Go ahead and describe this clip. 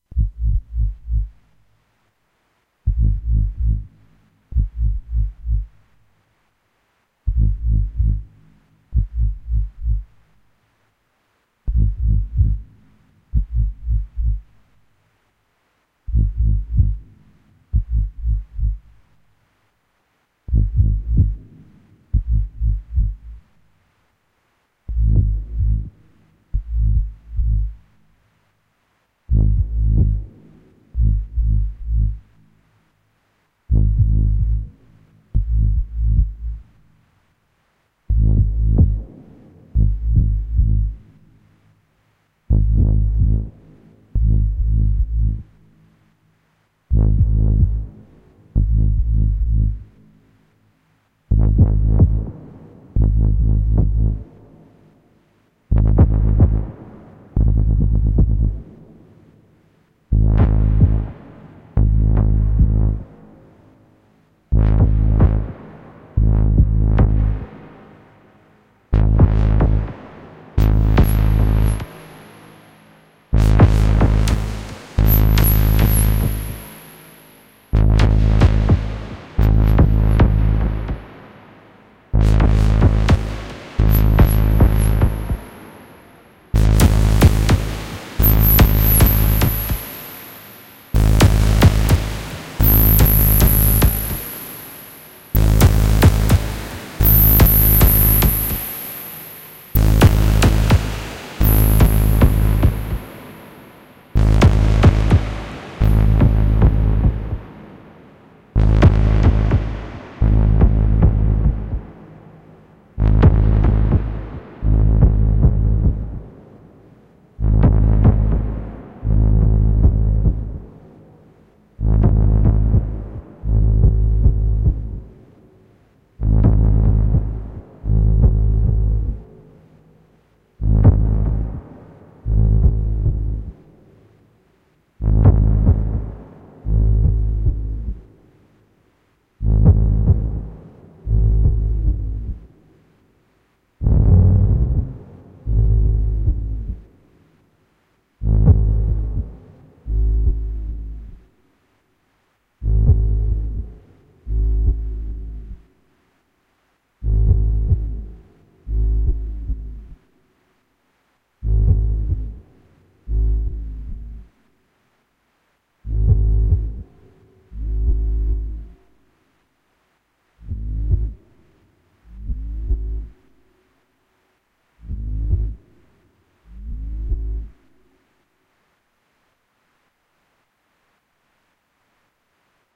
Pulsing rumbling drone sound i made on a my Behringer Model D analog synthesizer synced up with a TD-3, recorded with reverb and delay effects in Ableton Live. Some processing was done later in Adobe Audition to finalize this sound.
Close, drone, oscillator, Movie, Metallic, Noise, Mechanical, Synthetic, LFO, Robot, Machine, Factory, Buzz, Metal, Ambience, Industrial, resonating, modeld, Artificial, Hum, Ambient, Low, Cinematic, Buzzing, pulsing, Ambiance, Machinery, analog